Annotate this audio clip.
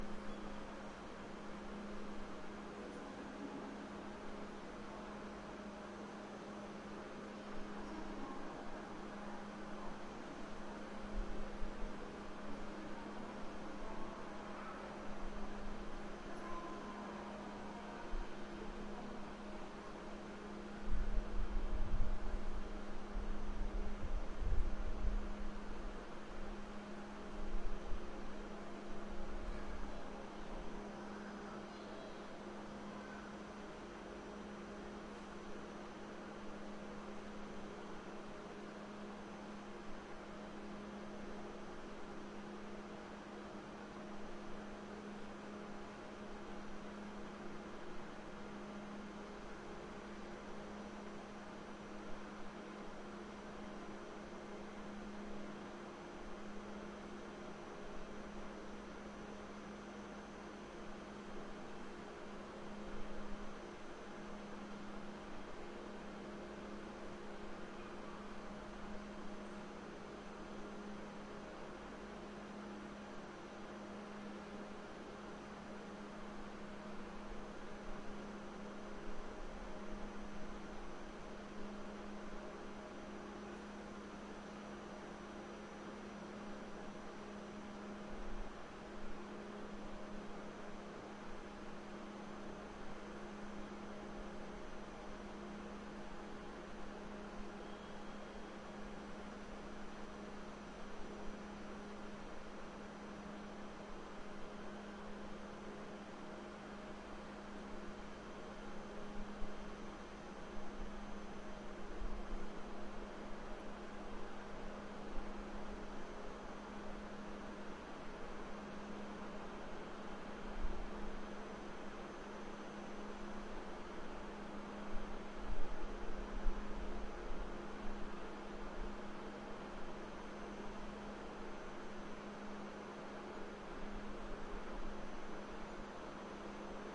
Dresden station
Dresden Hauptbahnhof (usually translated from German as Dresden Central Station, short form: Dresden Hbf) is one of two main inter-city transit hubs in the German city of Dresden. I just had a brief stop there, as I had to catch a train. Not much happens on this recording, but still... Inside microphones of the Sony PCM-D50.
dresden, field-recording, railway, station